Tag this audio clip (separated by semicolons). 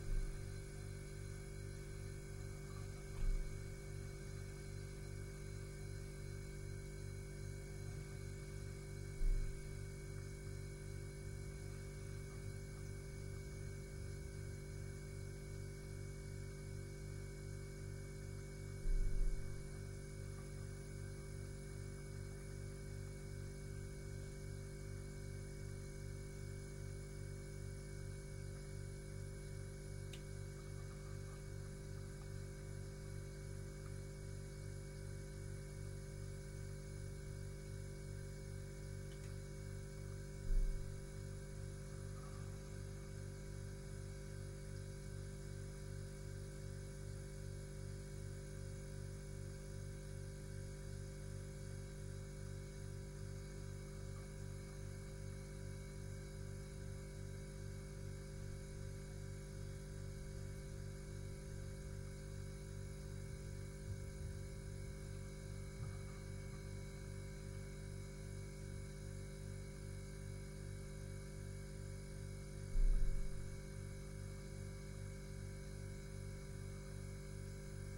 mono; noise; refrigerador; tone